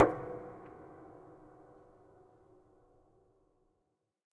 Hit avec pedale 1
hits on the piano with sustain pedal "on" to complete a multisample pack of piano strings played with a finger
hit, piano